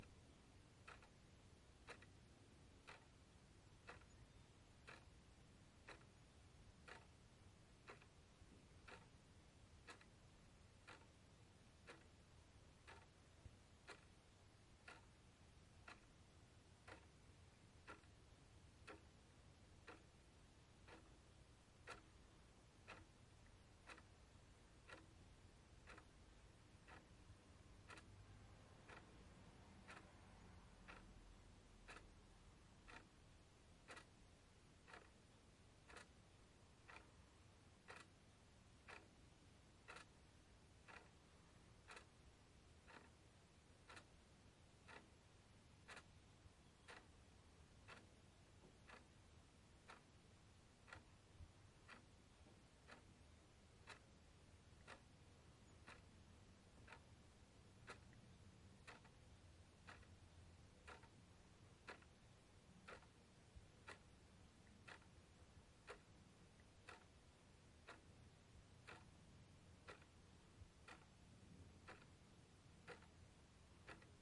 Clock tick, modern w room tone-7eqa 01-02
Recorded with Zoom H4N, ambience recording. Basic low cut filer applied.
ambience, backgrounds, field-recording